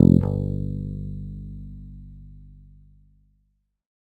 First octave note.
electric, bass, multisample, guitar